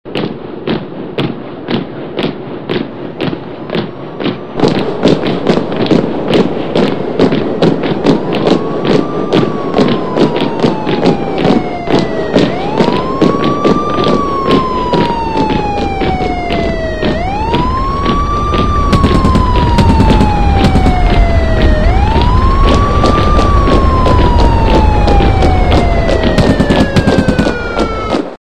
A sound of marching and running people. With air horn and gunfire